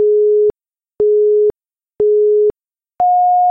A simple countdown sound (3.5 s long), I use in a sport timing program. Created myself with audacity